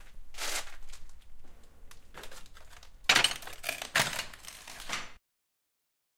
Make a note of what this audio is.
Broken plates
Recorded in an abandoned factory in Dublin.
plate, clang, crash, cutlery, metal